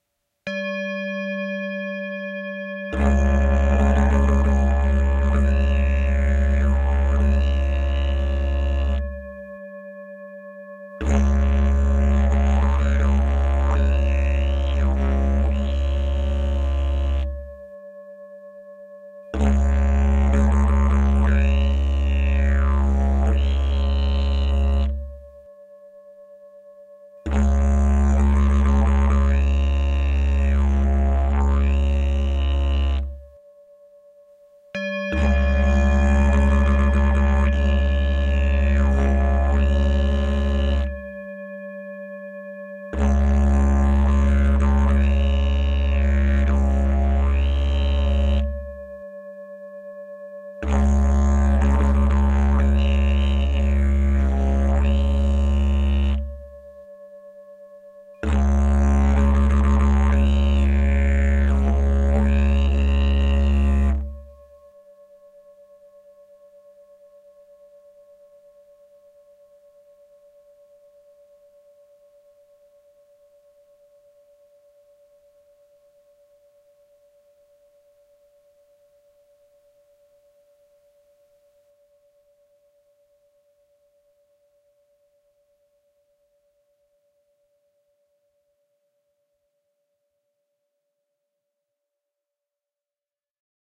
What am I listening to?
This is a short recording of my Didgeridu (C tune) and a tibetan sound bowl. The mood is medidative and calm. I uploaded the recording without any further processing, giving you the freedom to manipulate the soundfile in any manner you want.